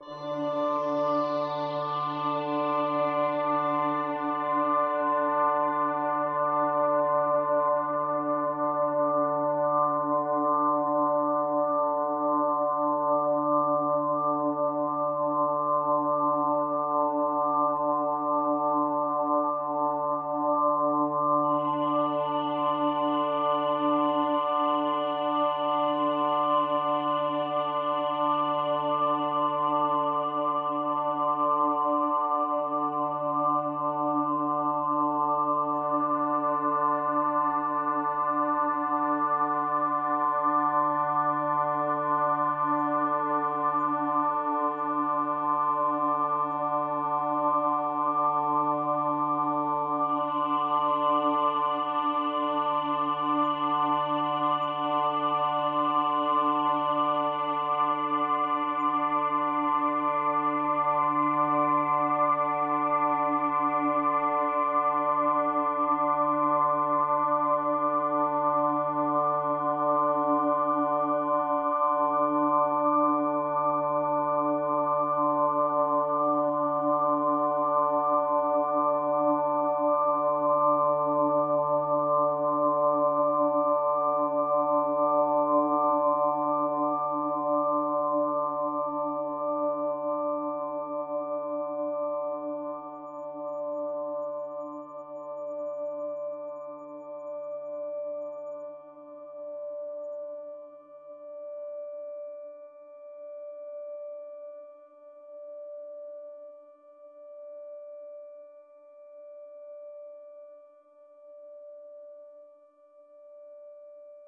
LAYERS 016 - METALLIC DOOM OVERTUNES-75
LAYERS 016 - METALLIC DOOM OVERTUNES is an extensive multisample package containing 128 samples. The numbers are equivalent to chromatic key assignment covering a complete MIDI keyboard (128 keys). The sound of METALLIC DOOM OVERTUNES is one of a overtone drone. Each sample is more than one minute long and is very useful as a nice PAD sound with some sonic movement. All samples have a very long sustain phase so no looping is necessary in your favourite sampler. It was created layering various VST instruments: Ironhead-Bash, Sontarium, Vember Audio's Surge, Waldorf A1 plus some convolution (Voxengo's Pristine Space is my favourite).
multisample, drone